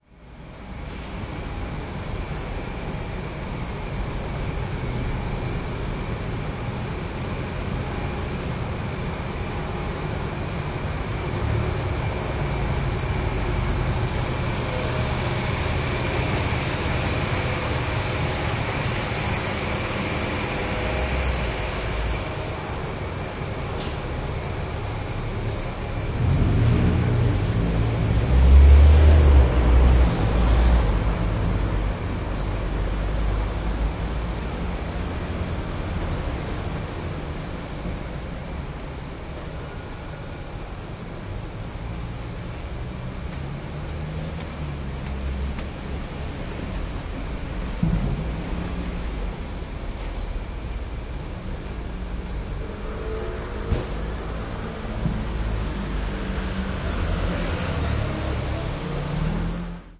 USJ Architecture Field Recording - Group 1 (2016)
Field Recording for the “Design for the Luminous and Sonic Environment” class at the University of Saint Joseph - Macao SAR, China.
The Students conducting the recording session were: Tiffany Cheung, Kenny Cheong and Tiny Haha
Field-Recording, Macau, Soundscape, University-of-Saint-Joseph